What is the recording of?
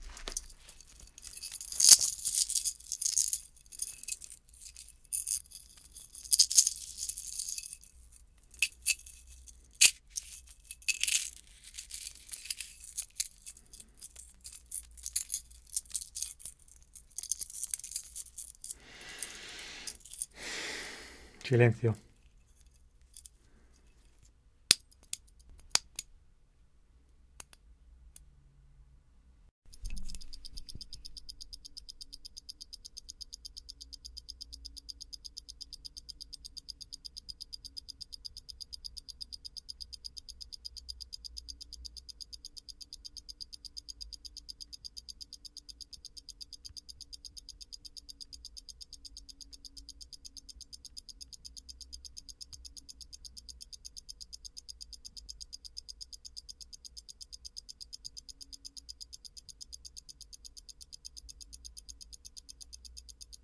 Various sounds from manipulating a stopwatch.